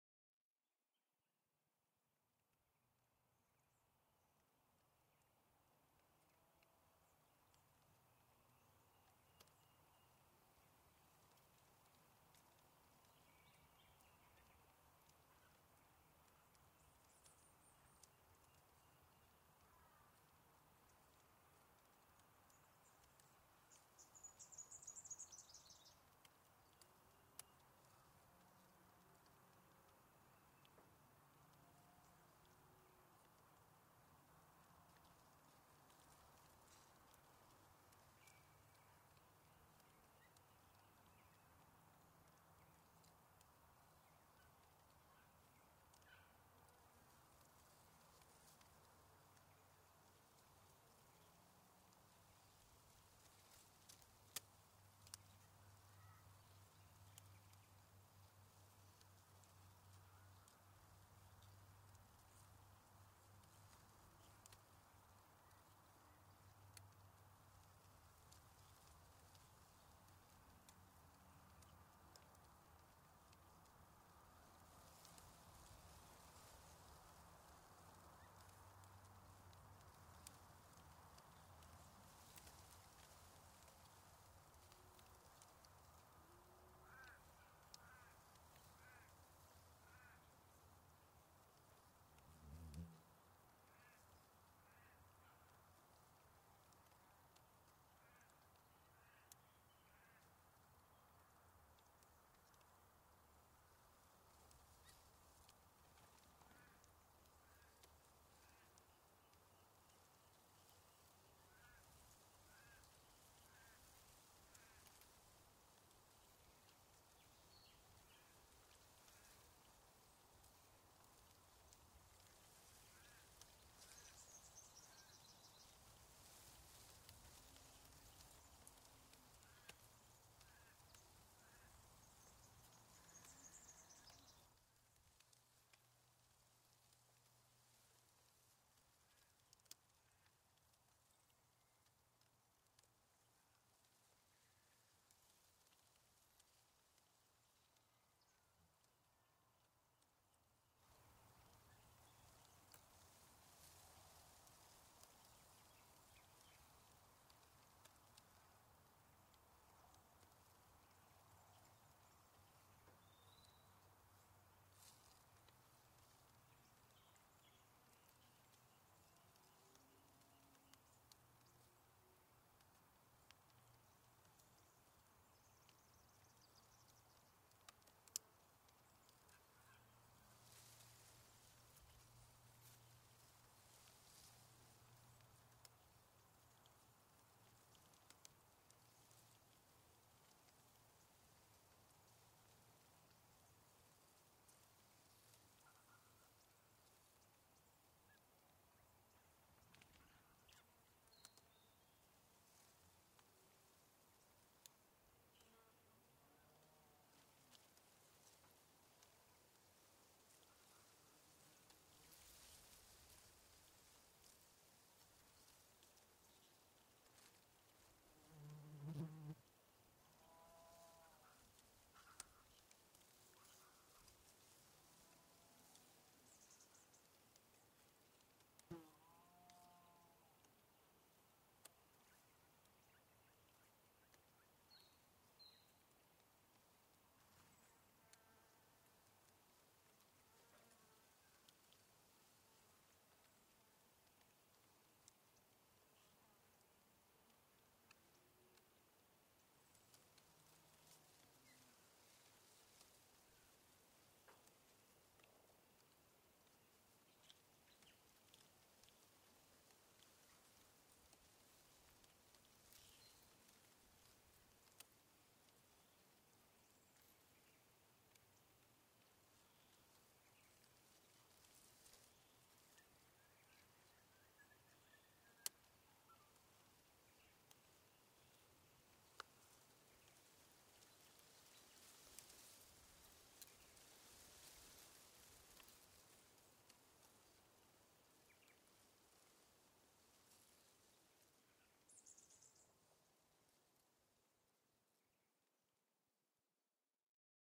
Recorded 2011-08-14 with MK416 shotgun Mic and Tascam DR-100 recorder.
This was recorded in at Running Stream in Central New South Wales, Australia, at a recce for the film Scenes From A Farm House by Miska Mandic.